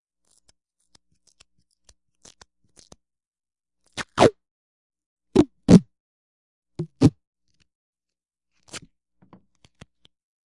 tape03-duct tape#1
Duct tape (silver, 2") being stretched and then finding it stuck to the fingers.
All samples in this set were recorded on a hollow, injection-molded, plastic table, which periodically adds a hollow thump if the roll of tape is dropped. Noise reduction applied to remove systemic hum, which leaves some artifacts if amplified greatly. Some samples are normalized to -0.5 dB, while others are not.